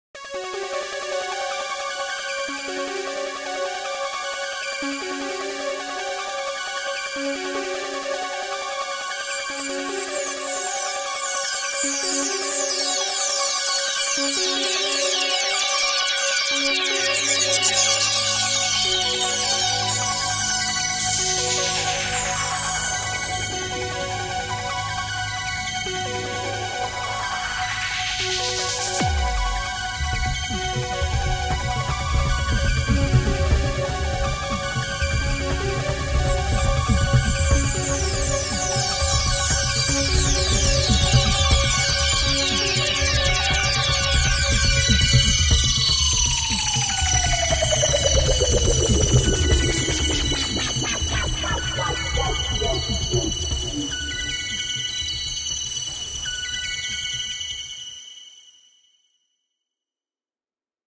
HV-TheUrgence
Like something deep in the universe coming at us. Halfway there is a dark rhythm joining the sound.
Made with Nlog PolySynth and B-step sequencer, recorded with Audio HiJack, edited with WavePad, all on a Mac Pro.
sfx, universe, soundfx